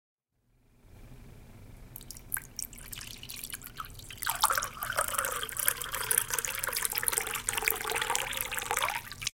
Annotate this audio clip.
Liquid in Glass 1
Liquid in Glass
Water, Glass, bar, Liquid